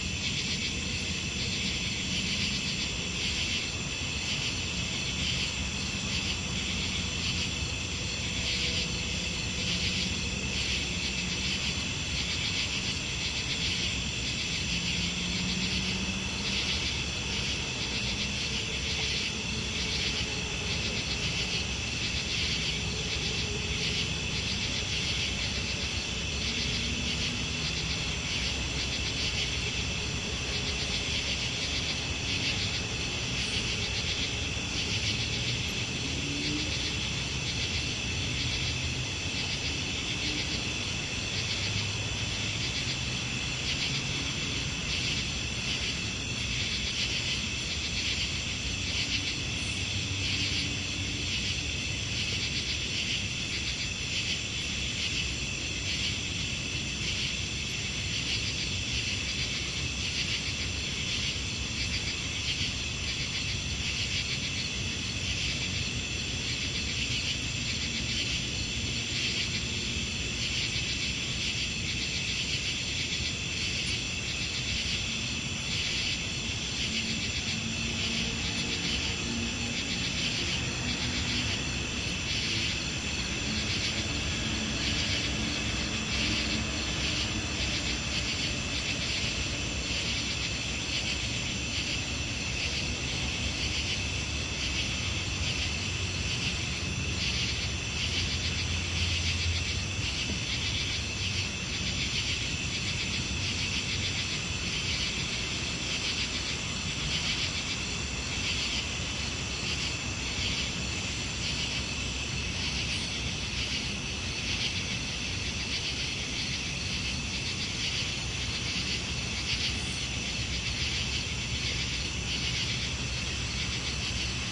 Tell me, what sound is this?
Suburban Summer Nights
Sounds of a suburban night. Crickets, birds up front. Highway traffic in the back. Recorded in Suffolk County, NY on August 3, 2012.
crickets, suburban, evening, chirping, night, chirp, forrest, camping, birds, frogs, thicket, road-noise, outside